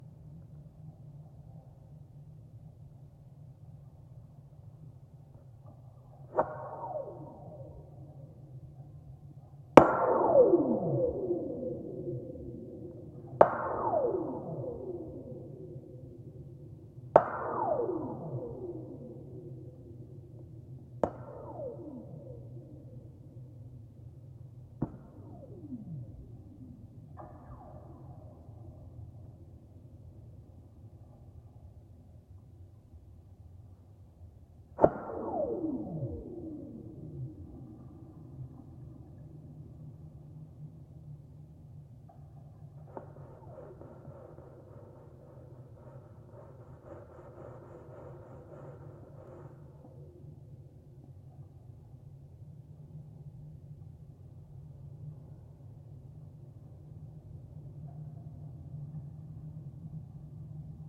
WNIU Radio 02
Contact mic recording of radio tower support cables (former DeKalb, Illinois, USA station WNIU). Characteristic "ray gun" sound when wire is struck.
contact-microphone, mast, metal, NIU